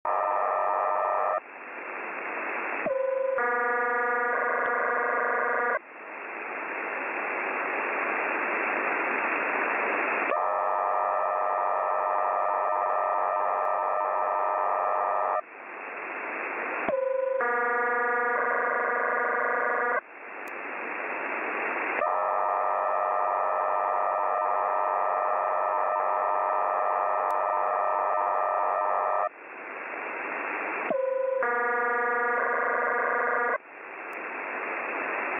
Faxer in pain
Found while scanning band-radio frequencies.